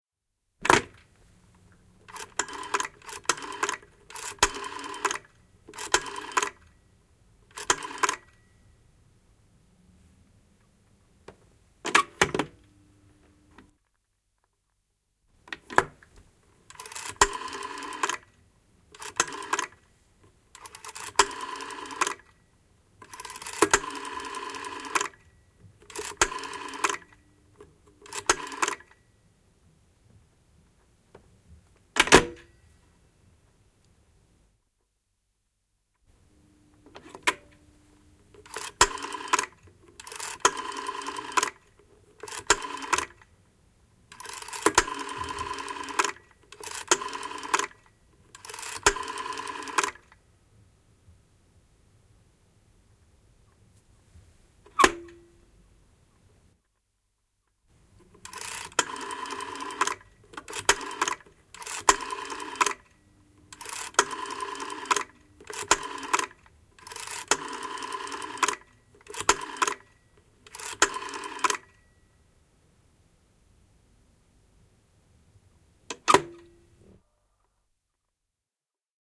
Puhelin, numeron valinta, lankapuhelin, pöytäpuhelin / An old landline telephone, dialing, receiver, pick up, hang up, analogic, various versions, 1950s
Vanha 1950-luvun malli. Luuri ylös, valitaan numero valintalevystä pyörittämällä, luuri alas. Erilaisia.
Paikka/Place: Suomi / Finland / Helsinki
Aika/Date: 08.12.1982